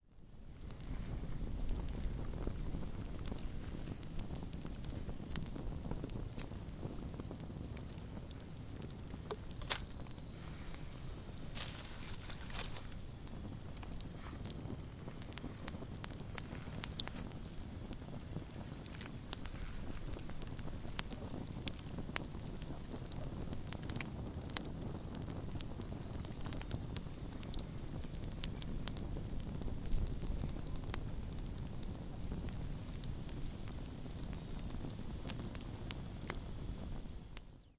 Wet wood started heating up in the campfire and air/water started escaping.